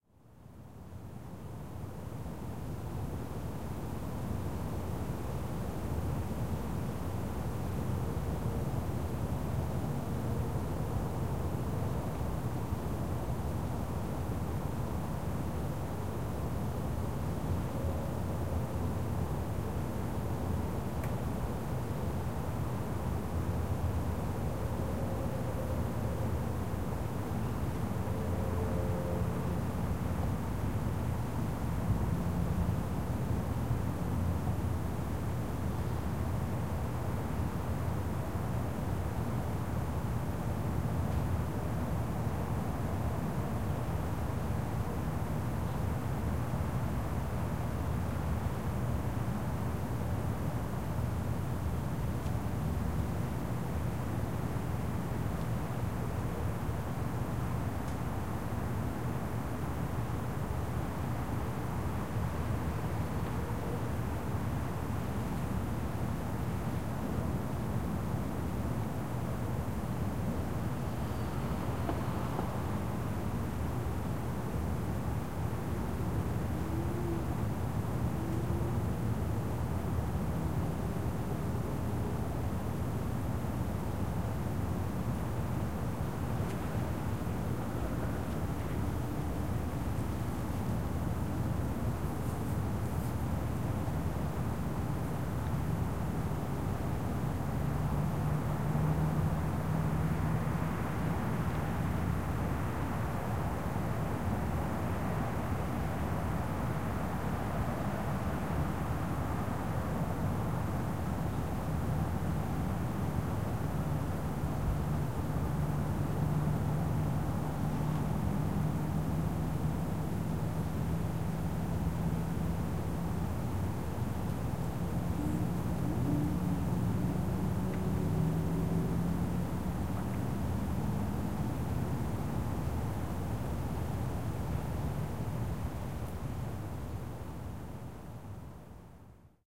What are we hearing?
Ambience City Quiet Night Air Tone
general-noise, atmo, airtone, night, white-noise, city, ambience, tone, atmos, ambient, atmospheric, background-sound, soundscape, background, air